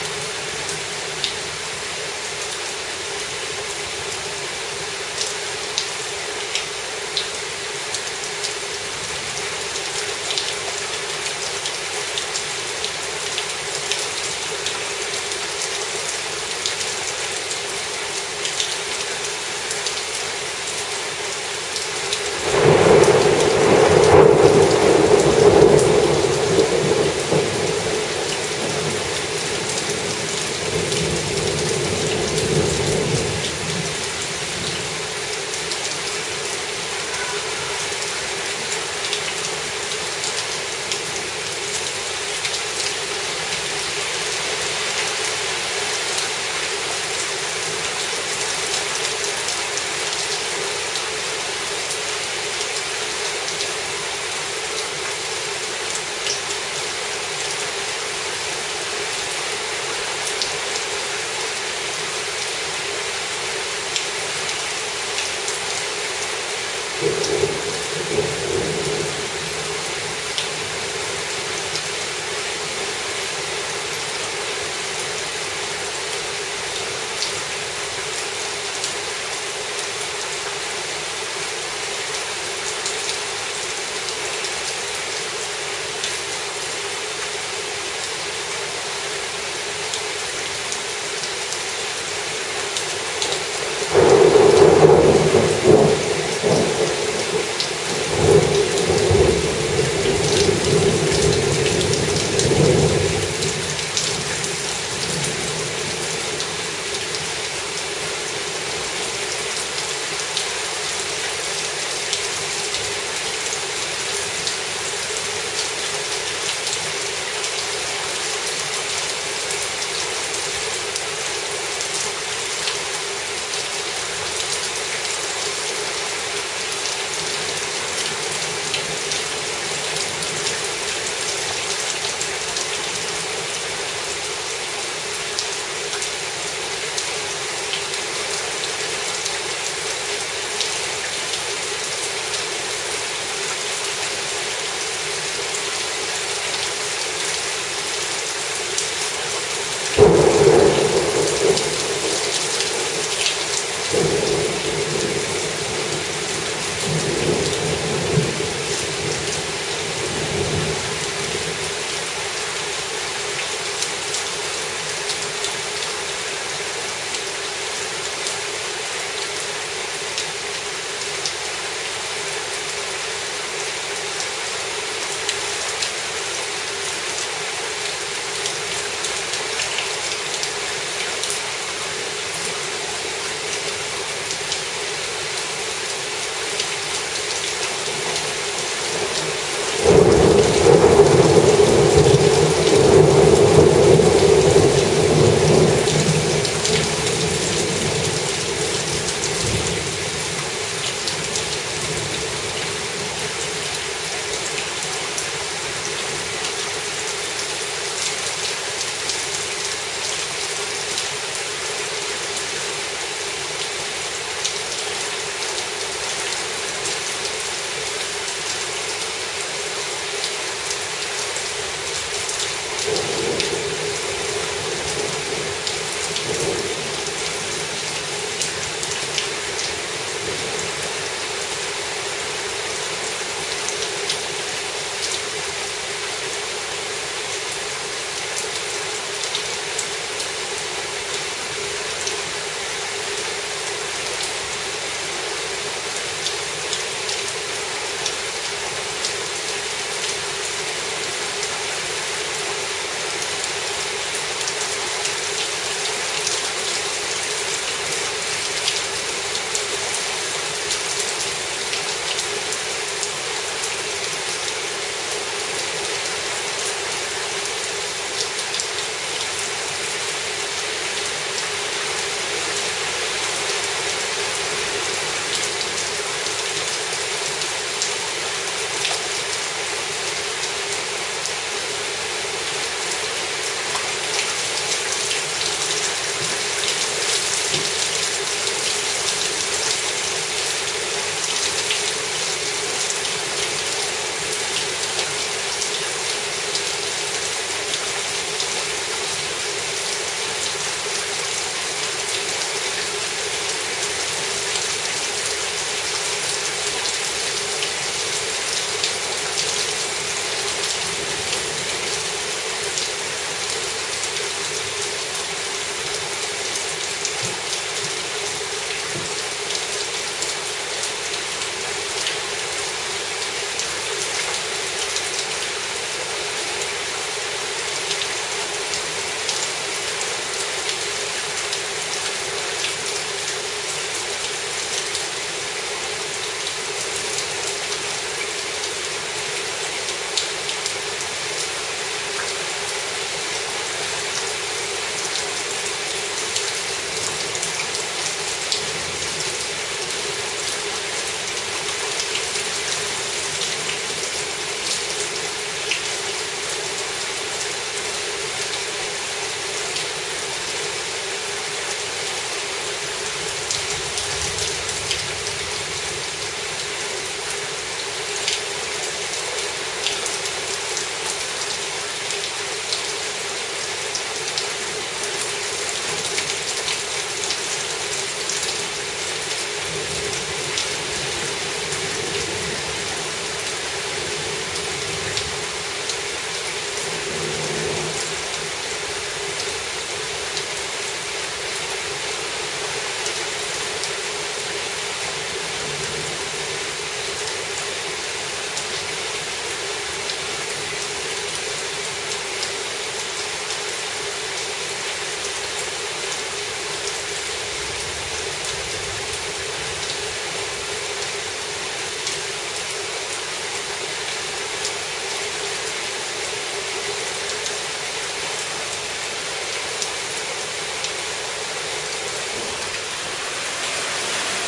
rain outside
A thunderstorm recorded outside on a patio. Heavy rain with occasional thunder.
heavy, thunder, thunderstorm, long, water, environment, downpour, pitter, patter, nature, lightning